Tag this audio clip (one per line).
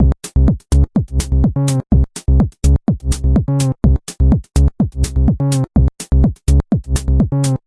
drums
loop
120bpm